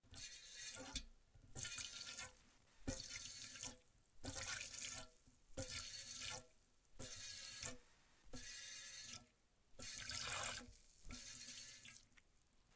Sound of milking an animal into a small metal pail.